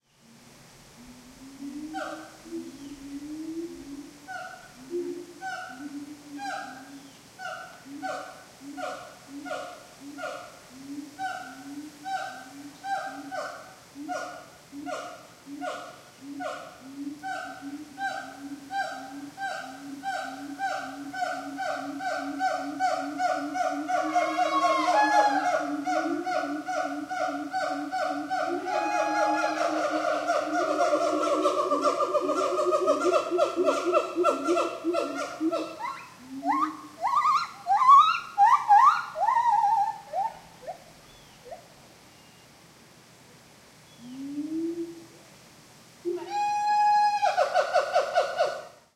Groups of Siamangs and Lar Gibbons calling to each other from separate islands, with a waterfall in the background. This was recorded closer to the island where the Lar Gibbons were. Recorded with a Zoom H2.